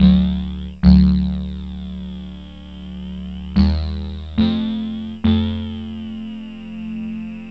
hauptteil fx3
harmonies with fx for sampling.
yamaha, an1-x, syntheline